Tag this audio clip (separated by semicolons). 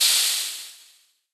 steam; firing; fire; shoot; blast; shot; gun; burst; industrial; weapon; woosh; piston